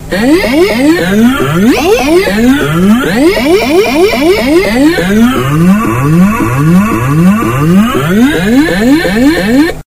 SPACESHIP ON THE FRITZ, AGAIN
aliens, beat, car, explosion, fart, laser, nascar, noise, space, weird